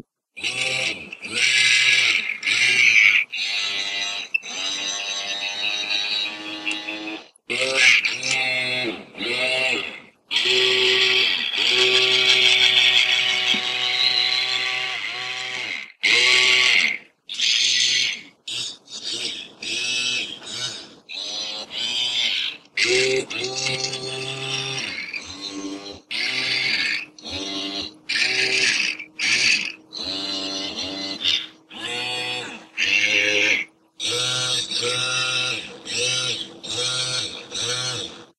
A USB robotic arm moving